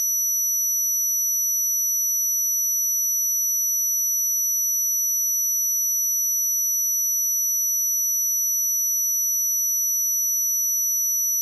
Doepfer A-110-1 VCO Saw - F#8
Sample of the Doepfer A-110-1 sawtooth output.
Captured using a RME Babyface and Cubase.
A-100, analog, electronic, Eurorack, falling-slope, modular, multi-sample, negative, sawtooth, slope, synthesizer, VCO, wave, waveform